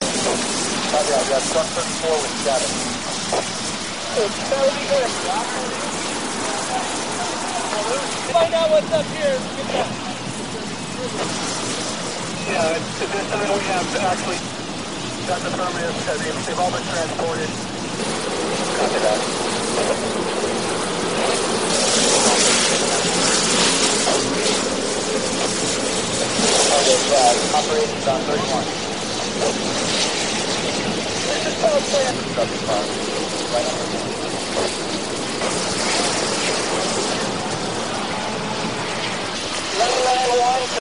san bruno fire2010 mono
A recording of a neighborhood fire caused by a gas explosion in San Bruno Ca. USA 2010
California, communication, co-operation, destruction, disaster, emergency, field-recording, fire, fire-men, fire-women, radio, san-Bruno, water